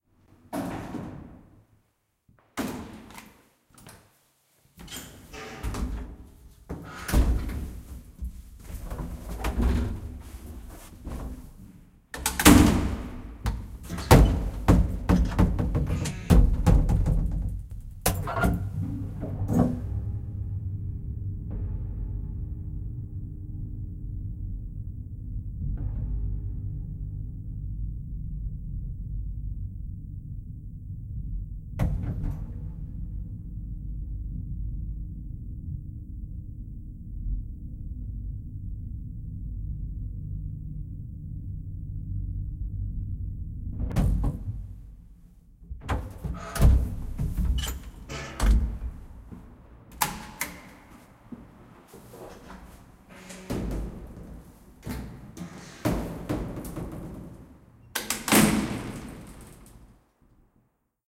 Old Lift
An old wooden and metal lift: entrance into the cabin, uphill and exit, with slamming doors. Recorded with Tascam DR-05. Edited with Audacity.
doors, wooden, lift